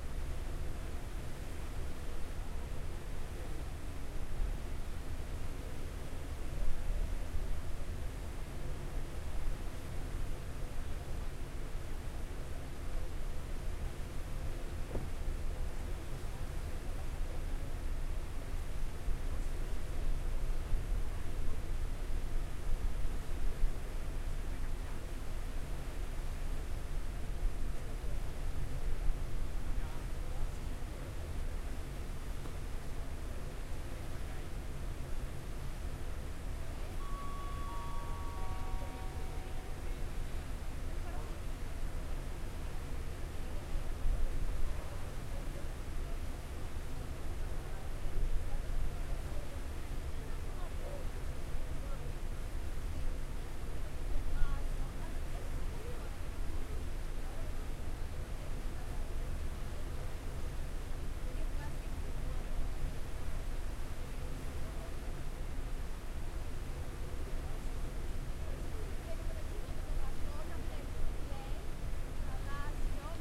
The engine of a boat and some people speaking in greek.